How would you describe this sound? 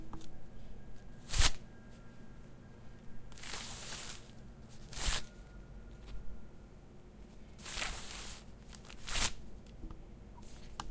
Receipt Paper Swipe